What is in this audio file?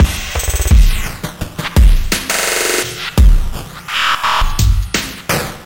Glitch Drum loop 9a - 2 bars 85 bpm
Loop without tail so you can loop it and cut as much as you want.